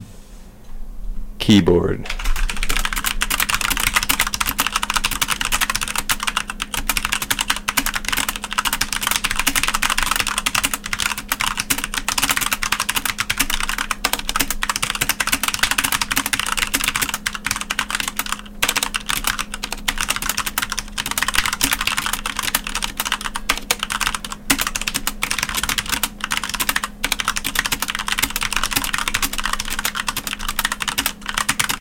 Sound 2 keyboard
clicking buttons on keyboard
clicking, tap, clack